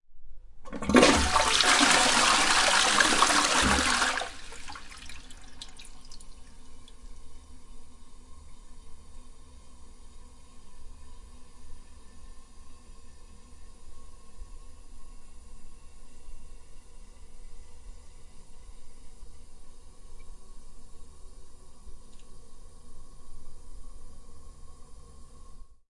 bathroom toilet flush D100 XY
bath, bathroom, d100, flush, pcm, recording, toilet